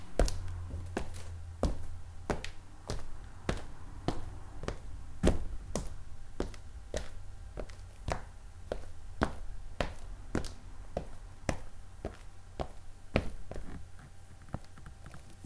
step sound
This is a low quality sound effect of me walking.
sound-effects, footstep, walking, person, sound, step, footsteps